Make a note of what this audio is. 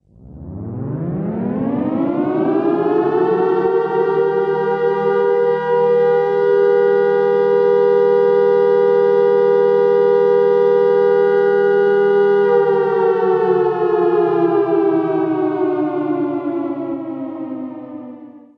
Low Siren Effect
Effects, Explosion, Low, Siren, weather